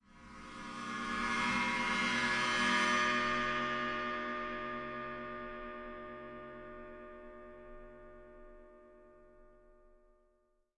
Bowed cymbal recorded with Rode NT 5 Mics in the Studio. Editing with REAPER.